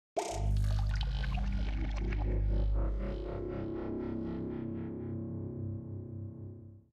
Sound of transformers made from various synthesizers
Reggae, A, House, transformers, 1, WaterminD